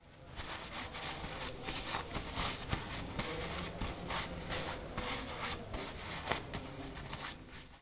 Perception of the sound: The sound of drying your hands with a toilet paper.
How the sound was recorded: Using a portable recorder (Zoom h2-stereo),with the recorder leaved in the sink while drying my hand with the paper.
where it was recorded? UPF Communication Campus taller's male bathroom, Barcelona, Spain.